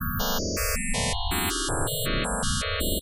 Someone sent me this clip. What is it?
Suspenseful sound, scaring me ahhhhhhh.